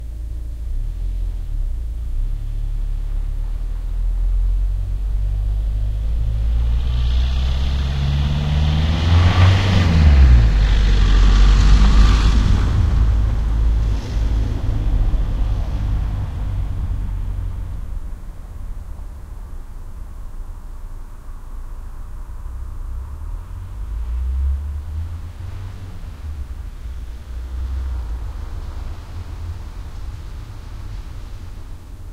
Driving by, snowy day
06.01.2016, outside
Recorded with a crude DIY binaural microphone and a Zoom H-5.
Cut and transcoded with ocenaudio.
by, driving, field-recording